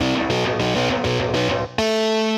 101 Dry glide gut 02
crunchy guitar riff
free, heavy, riff, guitar